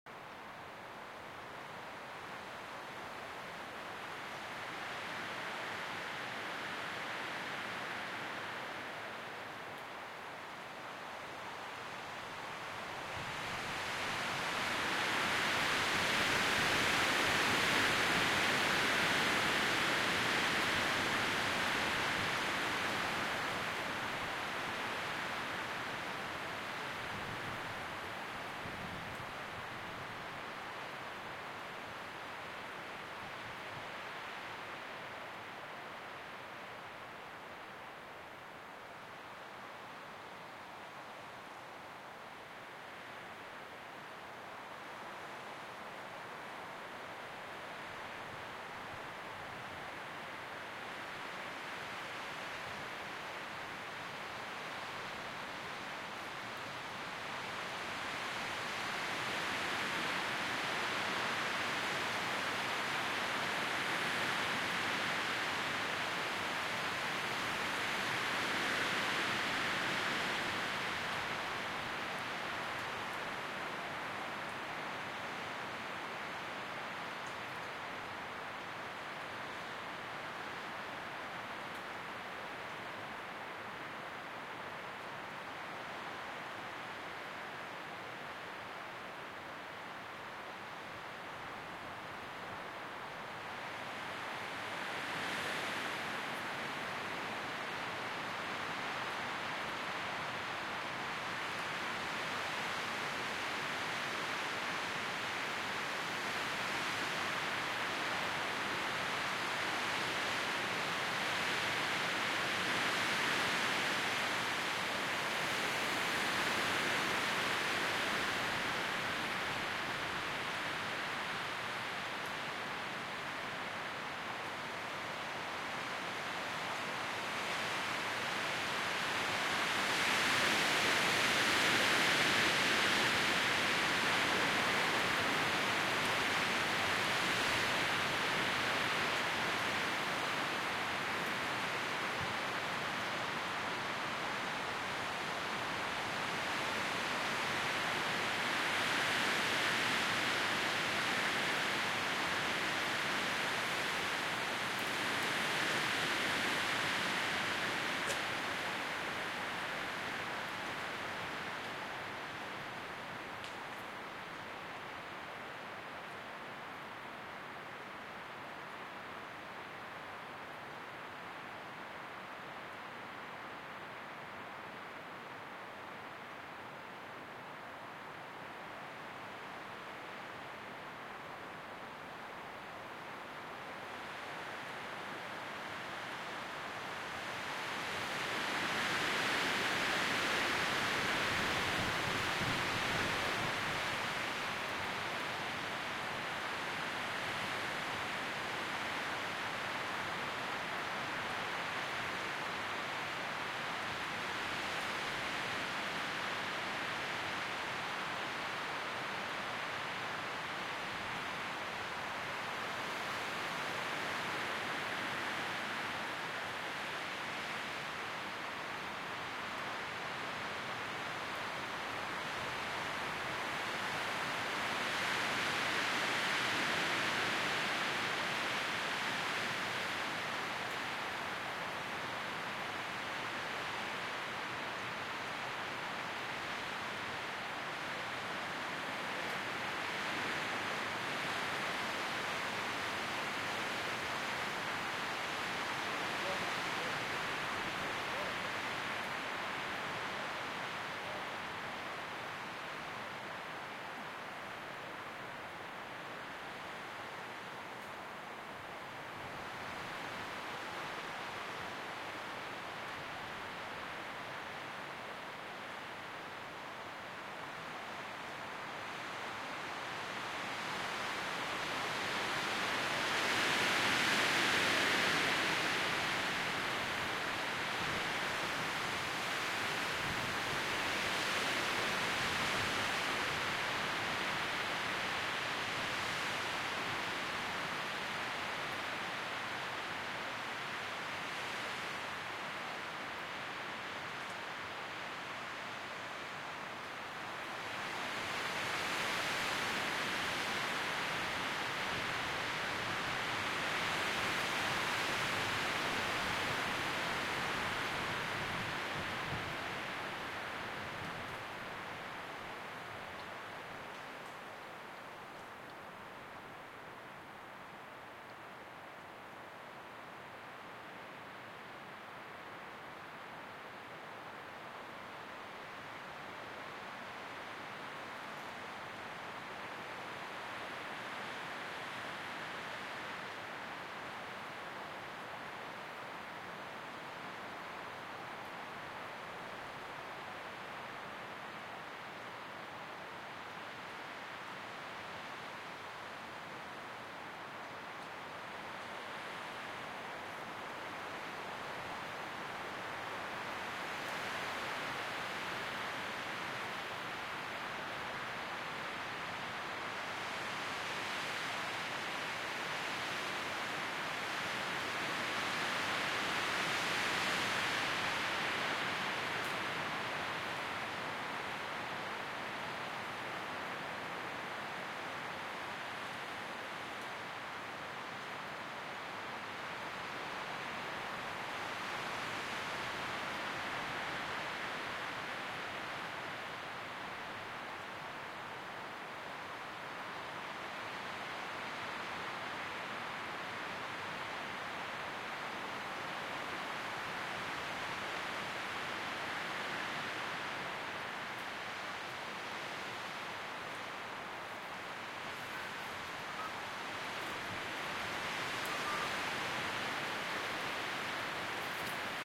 Wind Through Trees 2
Recorded on a windy day in Thrunton woods, Northumberland, UK.
Zoom h2n left in the middle of the woods.
You can hear:
- Wind
- Leaves rustling
- Me whistling at the end when I'm looking for the recorder!
Nature, Wind, Weather, Trees, Windy